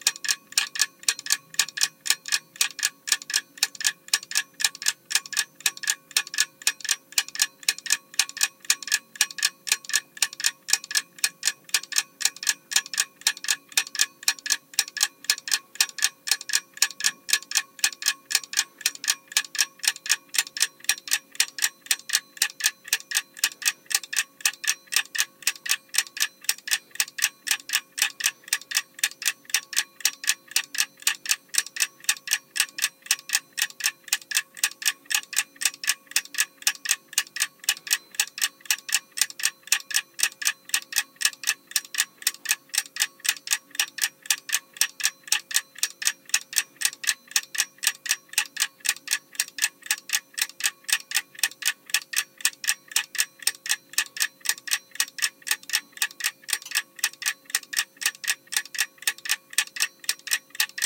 20090405.clock.mono.dry
clock ticking. Sennheiser MKH 60 into Shure FP24 preamp, Edirol R09 recorder
tic, machine, time, timer, clock, ticking, tac